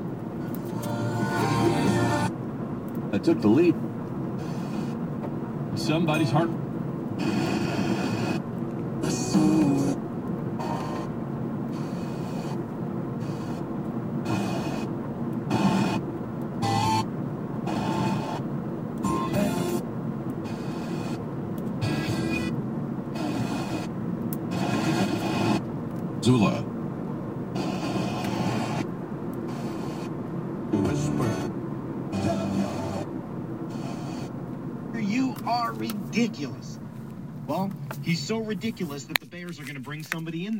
Radio Dial Tuning
Tuning my radio dial while driving. February 22, 3:00 PM.
Car, Channels, Dial, Driving, Field-Recording, Flipping, FM, Interior, Listen, Music, Noise, Radio, Station, Tune, Tuning